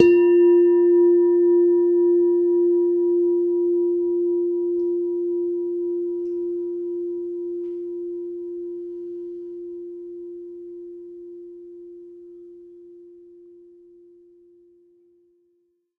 mono bell -9 F 16sec
Semi tuned bell tones. All tones are derived from one bell.
ping, bells, bell-tone, dong, ding, bong, bell, bell-set